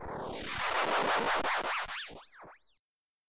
8bit Retro Vehicle Short effect
8bit, computer, cool, effect, loop, machine, old, original, retro, sample, school, sound, tune, vehicle